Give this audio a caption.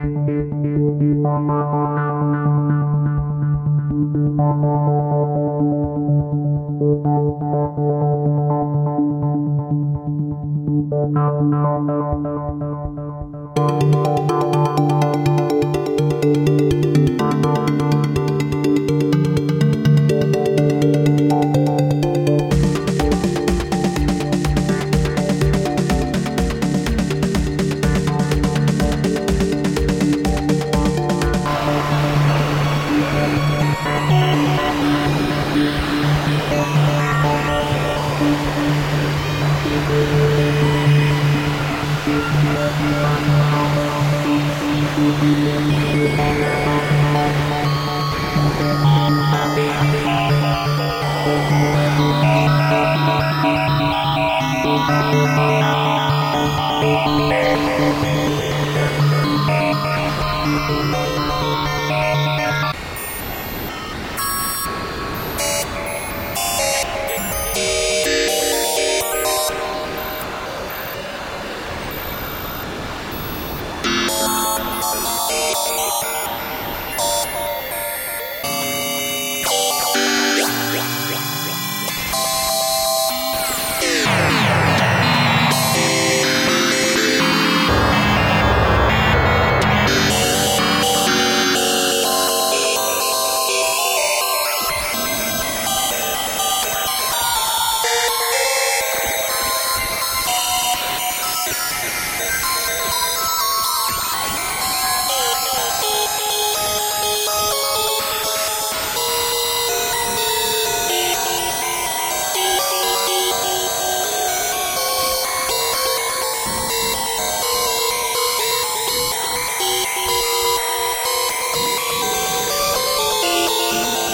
Mechanisms 2 mgreel - Do Sheep Dream Of Electric Androids
2 minute 04 second reel from my track “Do Sheep Dream Of Electric Androids?” from my album Mechanisms 2. This reel has 6 splices.
Machina, synth, modular, morphagene, mechanisms, HyperEx